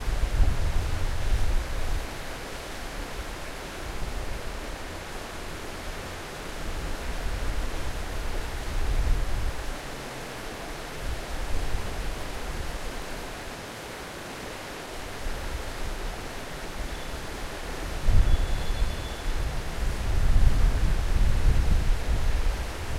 TEVERE SOUND
Zoom H1 recording on the river Tiber in Rome, windy day
nature, river, Rome, Tiver, water, wind